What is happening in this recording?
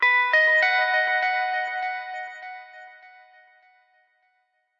Lead Acoustic Guitar 1
These sounds are samples taken from our 'Music Based on Final Fantasy' album which will be released on 25th April 2017.
Acoustic-Guitar, Sample, Guitar, Lead, Music-Based-on-Final-Fantasy